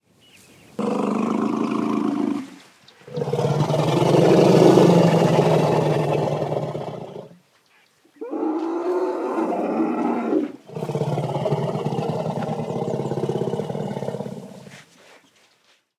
An elephant in Tanzania recorded on DAT (Tascam DAP-1) with a Sennheiser ME66 by G de Courtivron.